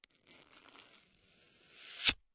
prise de son de regle qui frotte